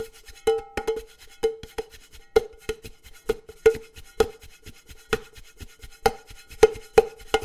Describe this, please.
Stomping & playing on various pots